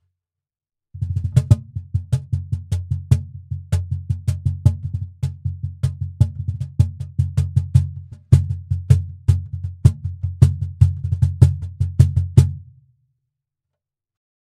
Cajon Recording-LOW
Cajon Recording with emphasis on low frequencies.Sample #1